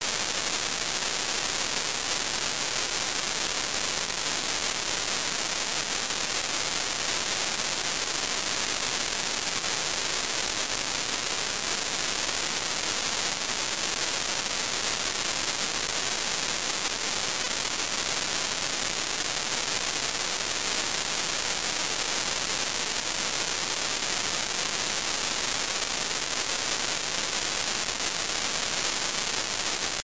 36 Dust Density 500
This kind of noise consists of a certain number of random values per second. This number is the density. In this example there are 500 random values per second.The algorithm for this noise was created two years ago by myself in C++, as an immitation of noise generators in SuperCollider 2.
density, digital, dust, noise